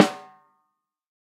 TAC14x8 SM7B VELO6
The loudest strike is also a rimshot. Microphones used were: AKG D202, Audio Technica ATM250, Audix D6, Beyer Dynamic M201, Electrovoice ND868, Electrovoice RE20, Josephson E22, Lawson FET47, Shure SM57 and Shure SM7B. The final microphone was the Josephson C720, a remarkable microphone of which only twenty were made to mark the Josephson company's 20th anniversary. Preamps were Amek throughout and all sources were recorded to Pro Tools through Frontier Design Group and Digidesign converters. Final edits were performed in Cool Edit Pro.